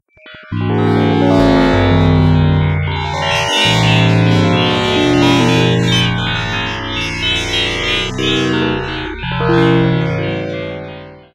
Magical transformation
mystical, spell, fairy, sfx, teleport, soundeffect, magic, video-game, effect, fantasy, game, transformation